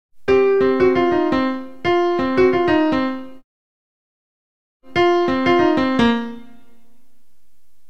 Jazz Piano Run
music, piano, jazz